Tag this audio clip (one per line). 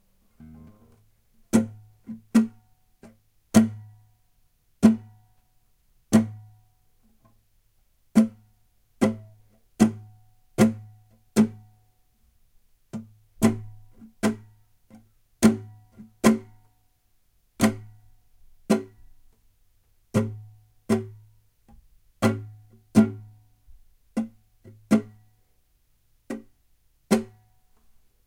crek
guitar
chk
fingernail
chuck
click